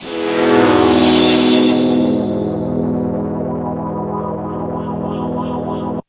Wobble Slicer E3
Wobble Slicer Pad